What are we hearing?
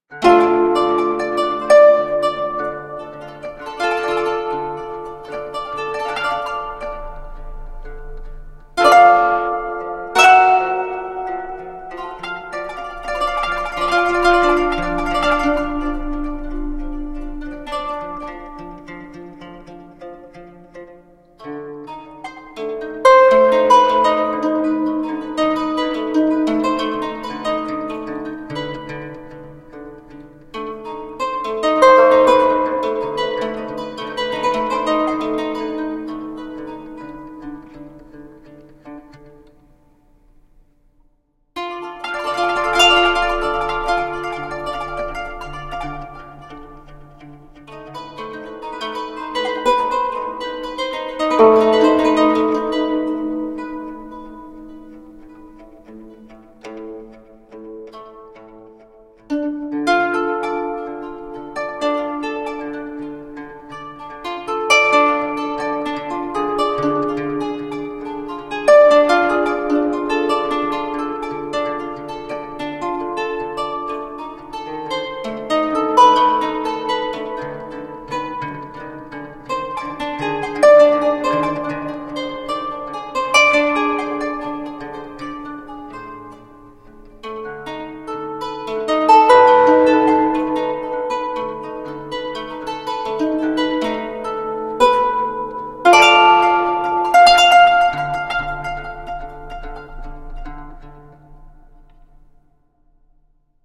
A little creepy ambiance bed I made for a DnD game, this is a moon guitar gently plucking around a d minor scale, with a few harmonic exceptions here and there!
background, minor, ambience, creepy